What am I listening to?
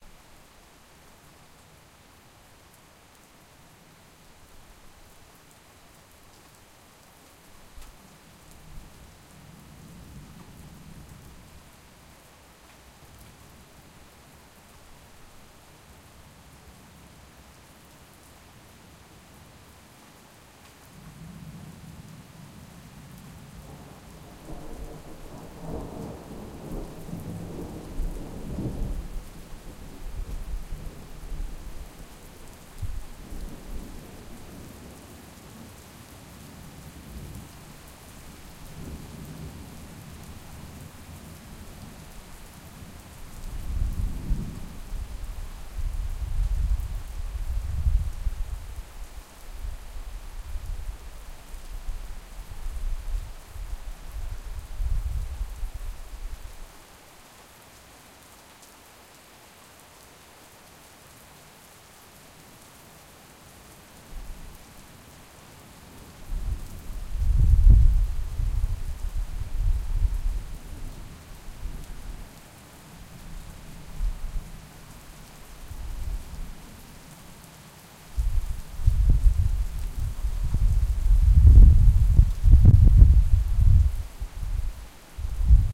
Raining lightly
Light rain with slight signs of oncoming thunder.